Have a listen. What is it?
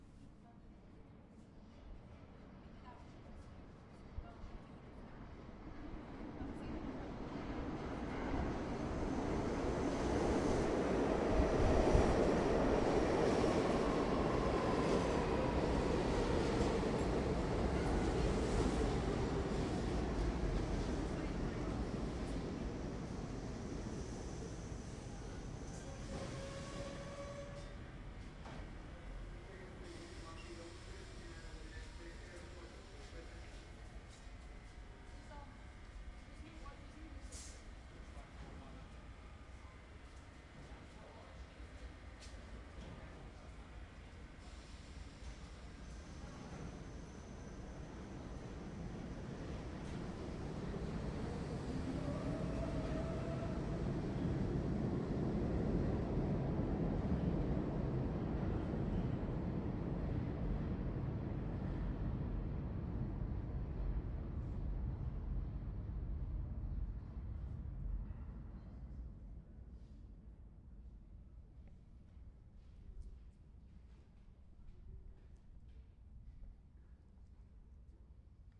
New York City subway entering and leaving a midtown station